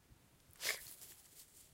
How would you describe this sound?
Dog sniff 2
A dog sniffing
sniff, sniffing